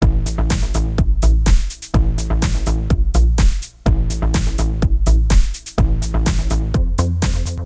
Made music clip with Music Maker Jam